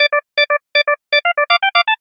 simple beep music